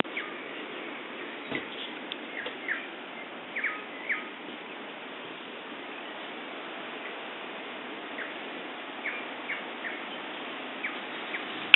Recording of birdsong in New Zealand from 01/12/2005. Recorded using the internal mic of a Samsung camera